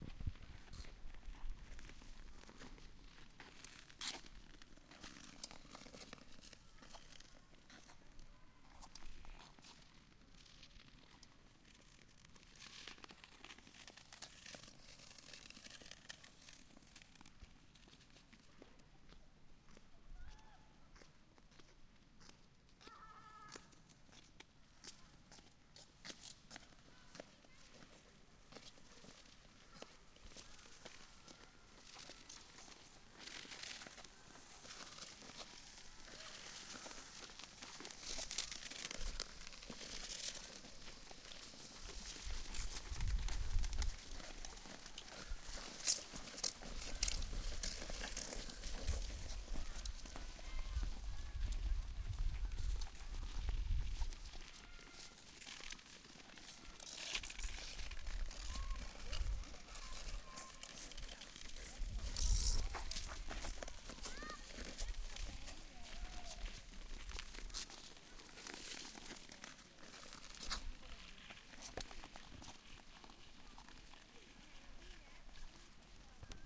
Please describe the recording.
Ice skating in Annen
Ice rink skating in februari 2012 on a sunny day. Multiple recordings of skaters passing by. Unexperienced children as well as semi-pros can be heard passing by from right to left. recorded in Annen, the netherlands with a zoom H2 recorder